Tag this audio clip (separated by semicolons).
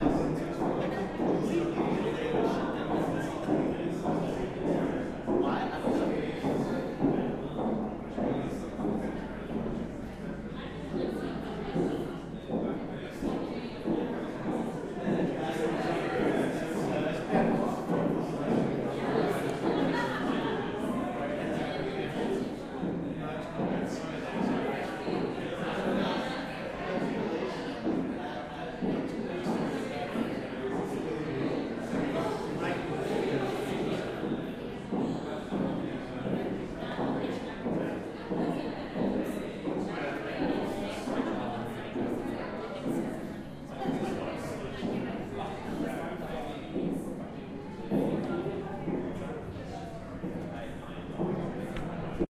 california; long-beach; sound-art; sound-installation; soundwalk-2007